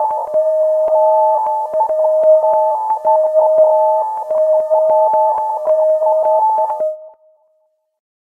If you choose to live a life full of sins, this is the sound you'll be hearing when you die. It's true, someone told me! Made with love and SoundToys' Little Alterboy on a sinewave tritone sequence.